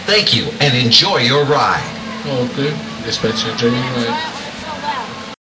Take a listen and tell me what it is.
wildwood ferriswheel
Riding the ferris wheel on Morey's Pier in Wildwood, NJ recorded with DS-40 and edited in Wavosaur.
rides, ambiance, nj, amusement, moreys-pier, field-recording, wildwood, park